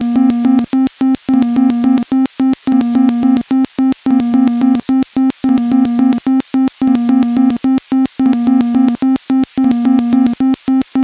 Cartoon-like siren recreated on a Roland System100 vintage modular synth
sci-fi, cartoon, siren, synthetic